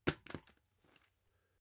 A small gun dropping to the ground. recorded with a Roland R-05